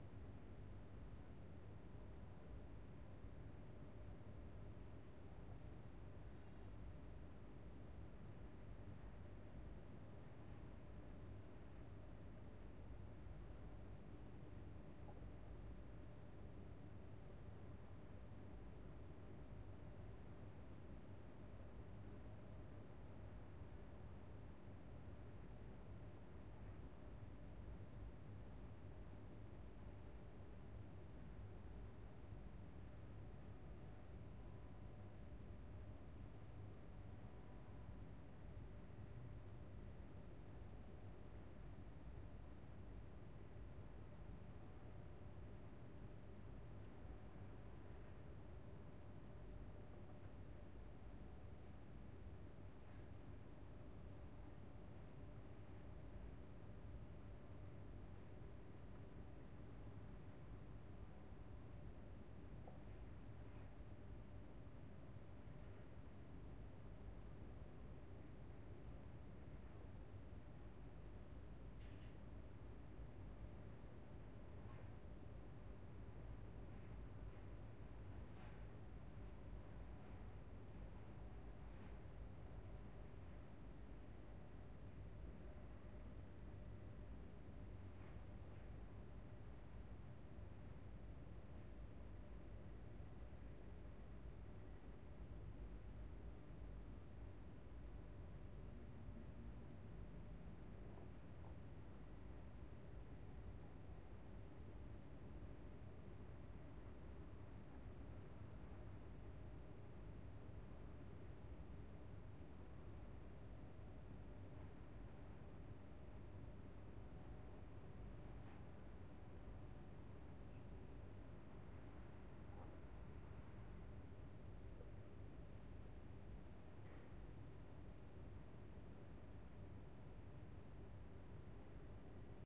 Room Tone Office Industrial Ambience 08
Indoors, Tone, Industrial, Ambience, Room, Office